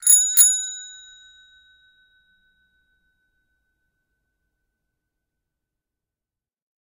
Bike bell 02
Bicycle bell recorded with an Oktava MK 012-01